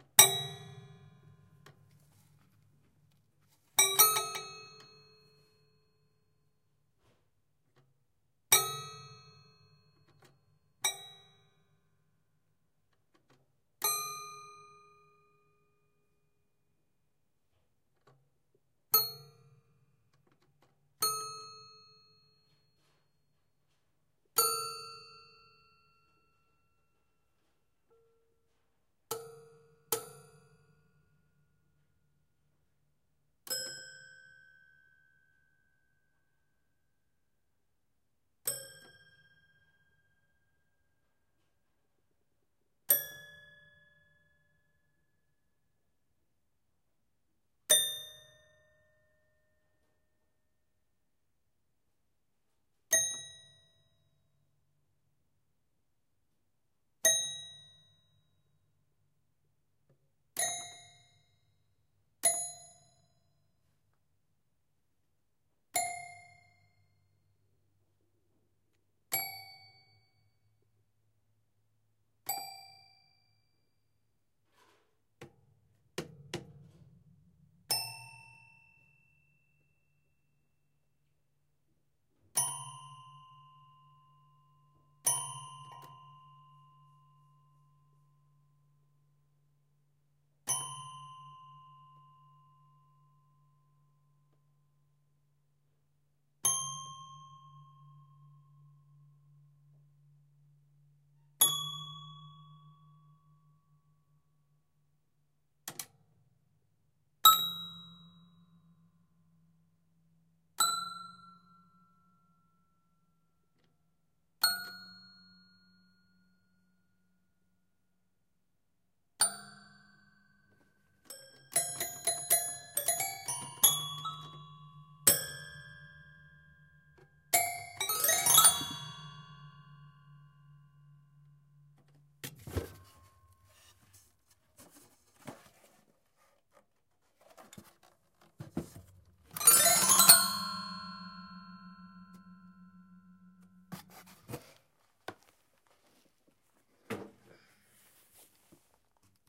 Half-assed multisampling of highly damaged toy piano in my grandmother's attic. One strike of each key, then some random hits and glissandos. Recorded with a Sony PCM-D50
broken, glissando, instrument, notes, out-of-tune, piano, toy